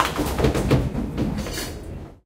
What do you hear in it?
B 4 bowling ball rolling thunder
A rolling bowling ball that sounds a bit like thunder